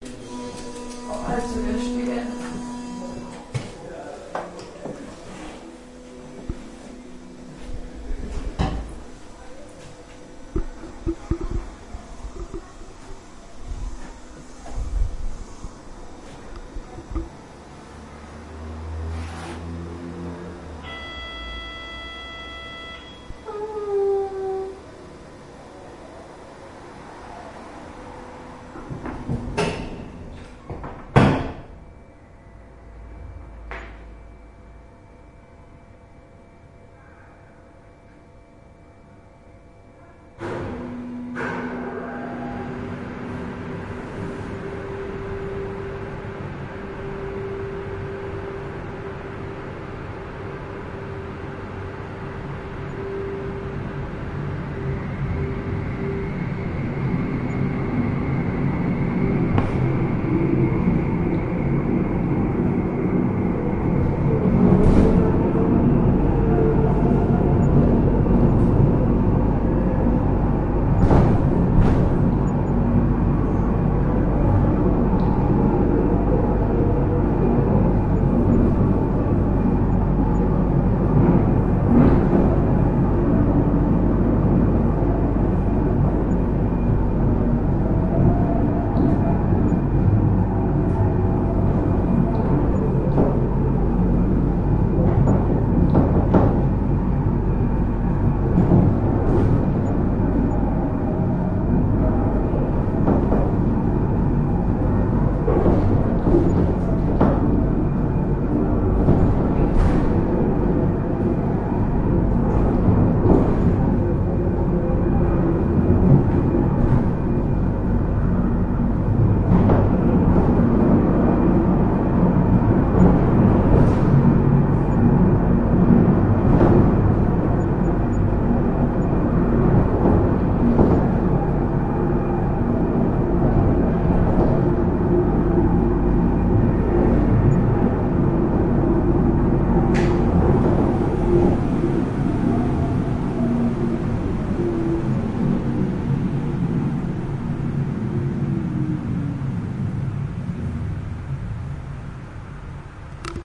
Im Bähnli
on a small train in switzerland
a, small, train